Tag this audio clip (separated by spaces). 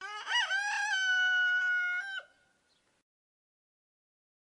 Cockadoodledo Rooster-call animal bird call mating rooster